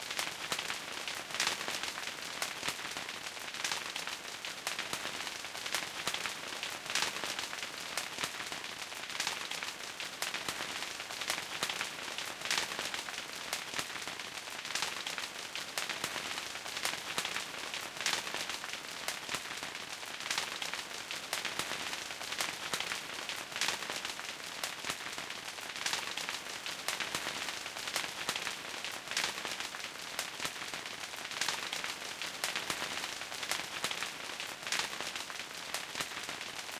This really starts to sound not like rain at all. you here the sharp transients of the original file very prominent in here, but i like it anyway and think this sounds interesting. maybe with (a lot) imagination this could be rain hitting some hard ground like stone or plastic or something.
It´s basically a short loop of the original file with some effects and equing. You can loop this easily without having unwanted noise or anything if it might be too short.